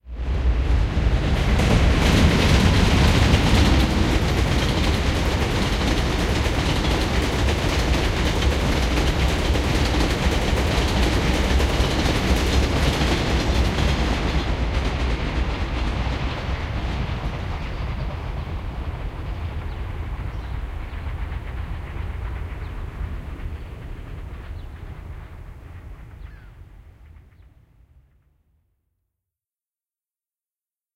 GO Train 7;15 am passby
am, 15, go, 7, train, passby, toronto